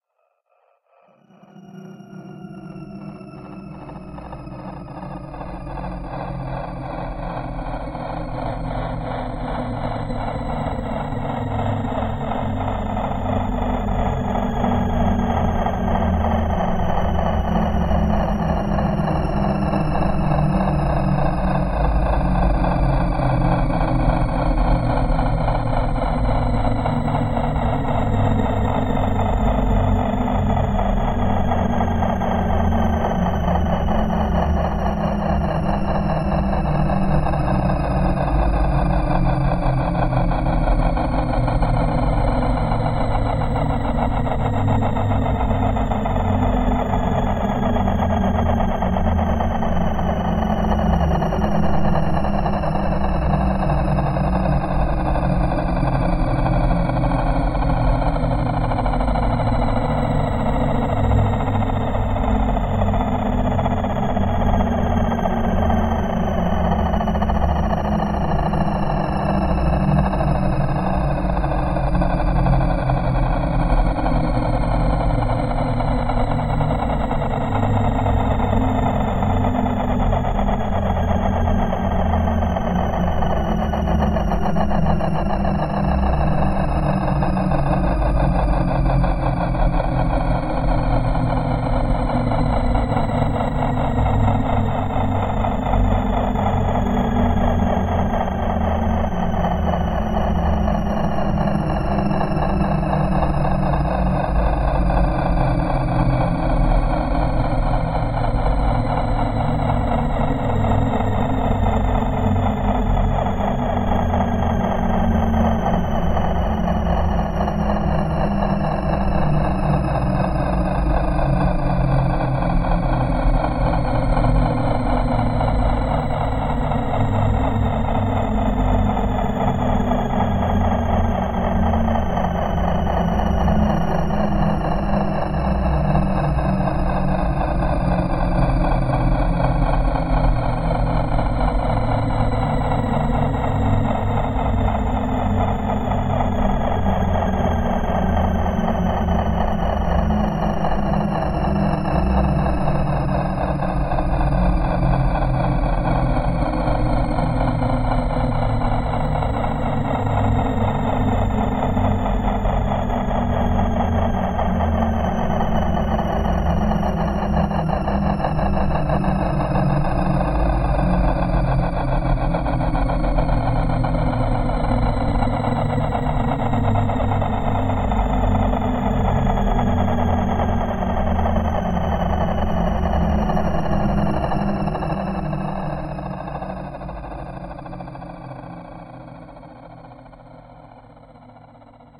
Dark Shepard Tone 1
Descending Shepard tone created on Renoise DAW with the help of "mda Shepard" and few other modifications were applied. The sound was inspired by Hans Zimmer's Shepard Tone from the new Blade Runner 2049 track called "Furnace". I hope You use it well...